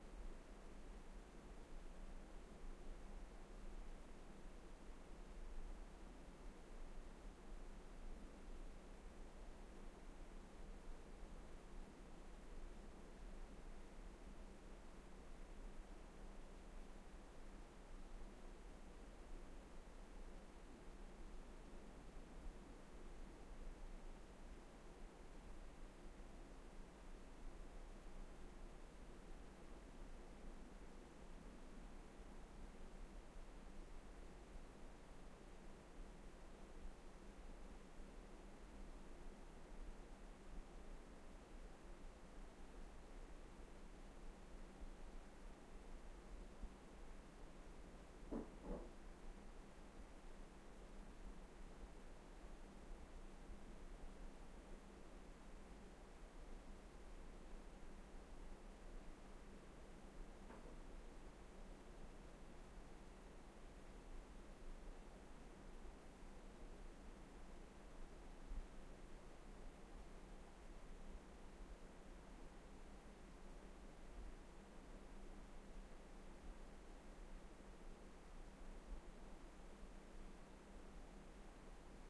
room tone quiet cellar with distant noises
recorded with Sony PCM-D50, Tascam DAP1 DAT with AT835 stereo mic, or Zoom H2